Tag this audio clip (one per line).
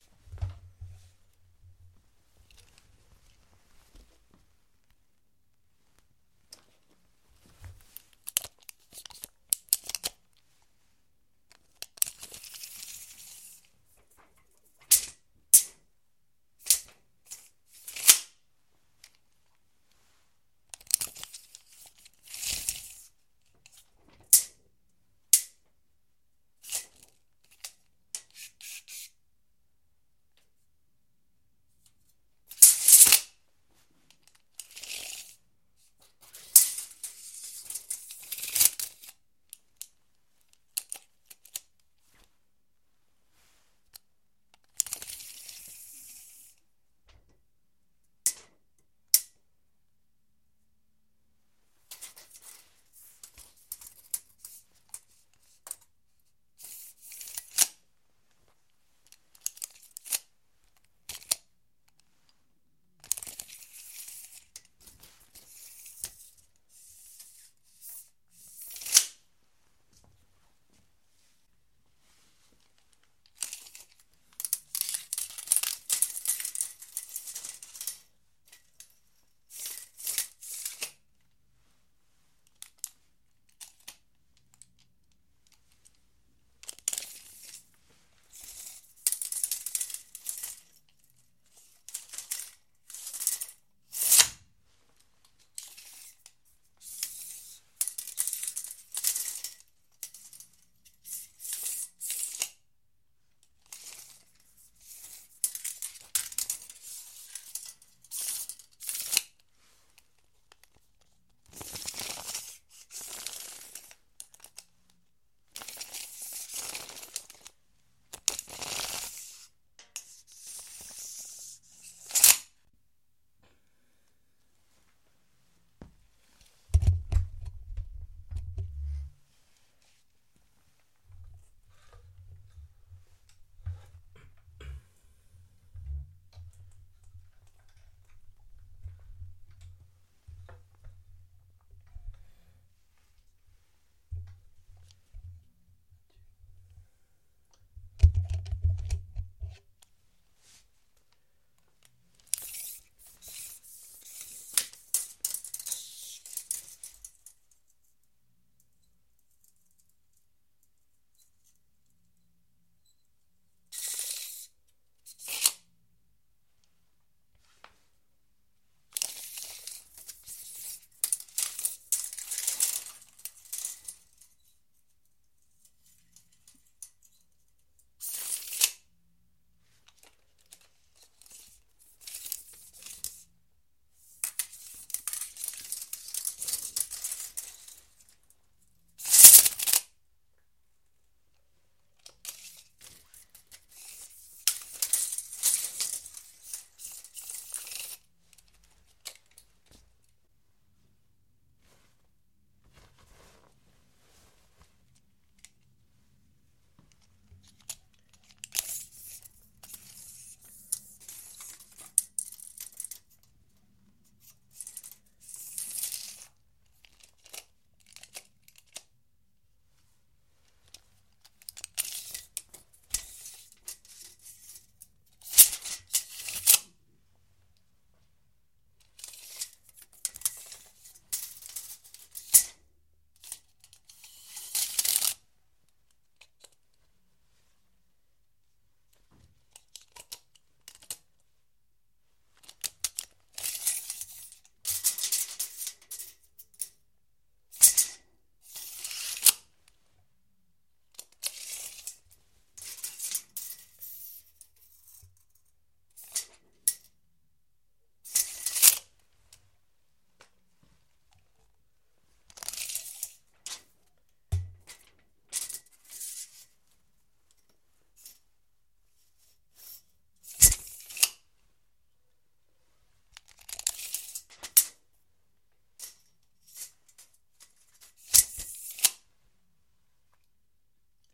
alba
cinta
construcci
flex
flexometer
il
midiendo
n
tape